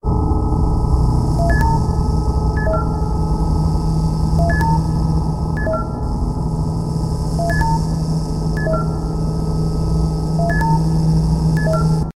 Part of a game jam I'm doing with friends. Computer idle used in game, must find it and interact with it. Bips sound mostly; done with Dimension. buzzing sound is my voice, modyfied heavily.
ambient, electronic, loop, ambiant, computer, idle, fx, bip